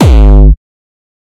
Distorted kick created with F.L. Studio. Blood Overdrive, Parametric EQ, Stereo enhancer, and EQUO effects were used.
distortion, drumloop, beat, hard, drum, trance, kick, progression, synth, distorted, techno, hardcore, kickdrum, bass, melody